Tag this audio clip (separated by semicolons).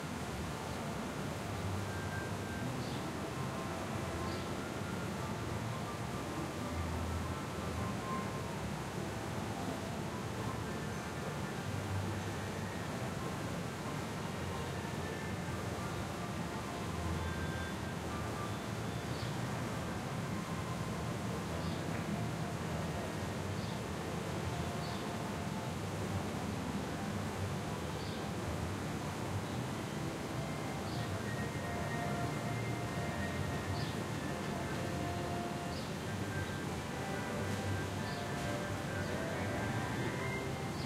atmosphere; thrill; environment; themepark